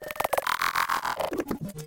transformer sound a like
fx, heavy-processe-sound, sound-fx, voice-destruction